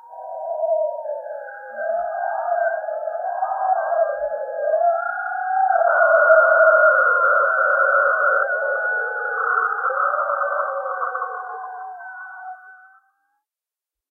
Unscreamed, vol. 2
I'm going to place some parts of damped-or-not scream.
creepy, horror, macabre, scary, scream, spooky, thrill, weird